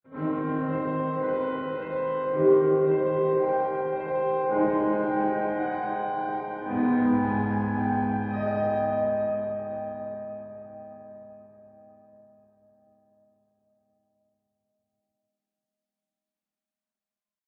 ghost piano 3
This is the original bridge, more emphatic and with a more agitated moving line.